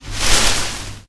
HOT SIGNAL.Leaf sounds I recorded with an AKG c3000. With background noise, but not really noticeable when played at lower levels.When soft (try that), the sounds are pretty subtle.
bushes, noise, shrubbery, bush